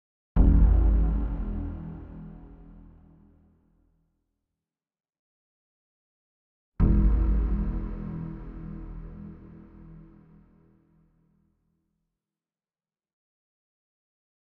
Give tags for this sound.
Space
Trailer
Braaam
Cinematic
SFX
FX

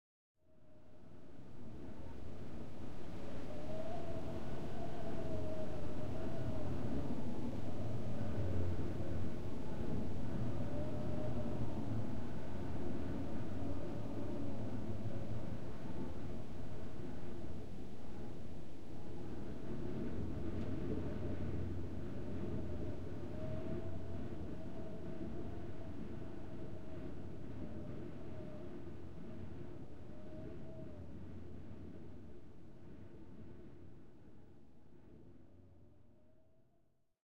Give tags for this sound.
aire
campo
viento

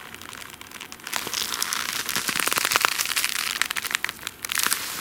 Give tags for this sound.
block
clench
clenching
creaking
fight
fist
hit
kick
leather
melee
punch

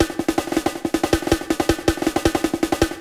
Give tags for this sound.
drums
programmed

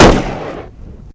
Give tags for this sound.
explosion
shot
gun
loud

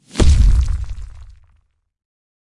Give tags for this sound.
boom
boomer
cinematic
effect
film
filmscore
fx
game
hit
impact
interface
metal
motion
move
movie
riser
riser-hit
score
sfx
sound
sounddesign
stinger
swish-hit
swoosh
trailer
transition
UI
whoosh
woosh
woosh-hit

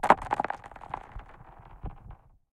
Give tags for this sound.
chill
cold
crack
field-recording
ice
impact
lake
winter

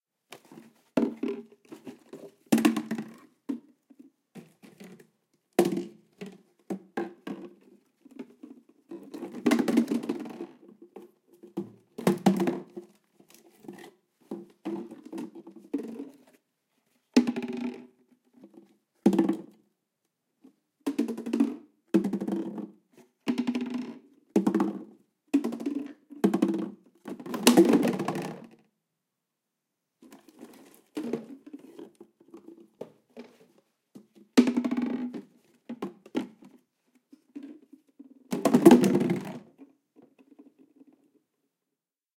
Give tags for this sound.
bottle
falling

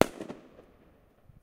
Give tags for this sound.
Firecrackers
Loud
Explosion
Fireworks

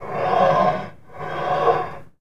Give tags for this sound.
friction
steel-plate
stereo
metallic
rub
boiler-plate
scratch
scrape
xy
metal

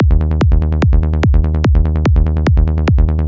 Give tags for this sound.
goakick
goa-trance
psy
psytrance

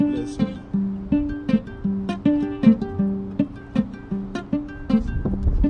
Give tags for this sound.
instrument
ngomi
wood

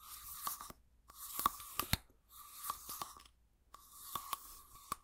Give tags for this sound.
Extend
reach
prolong

